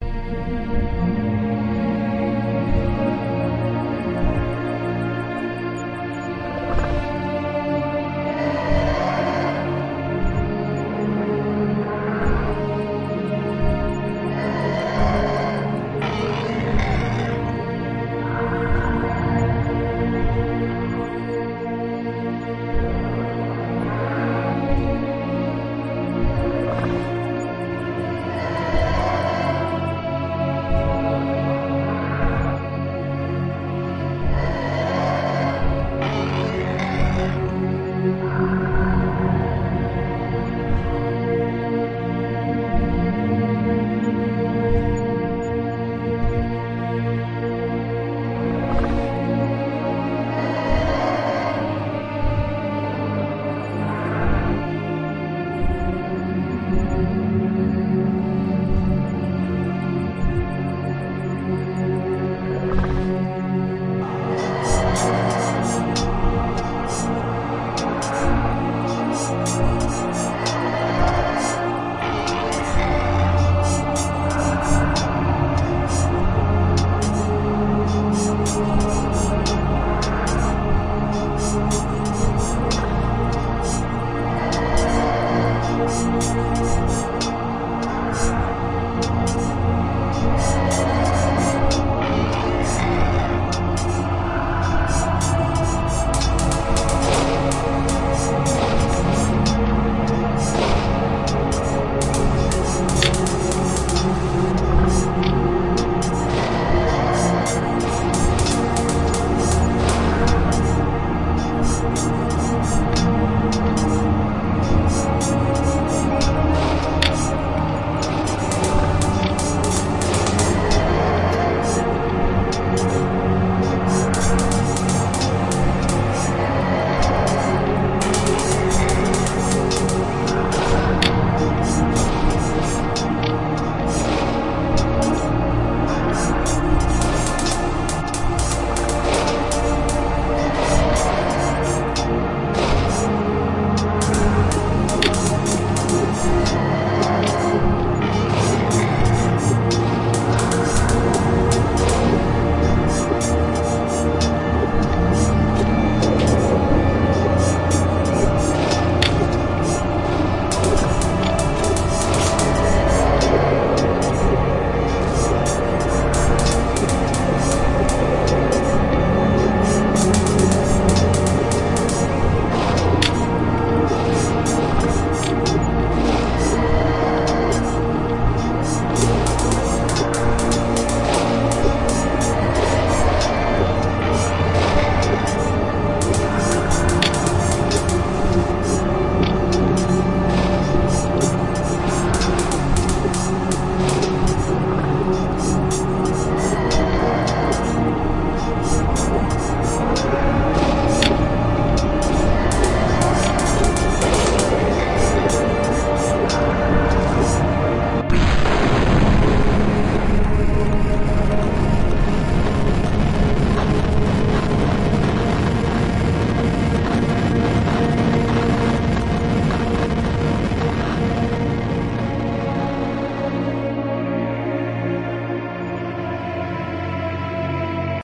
End Of Time Clip FINAL BY KRIS KLAVENES
time-travel, film, sad-music, dramatic, space, cinematic, creepy, clip, terrifying, black-hole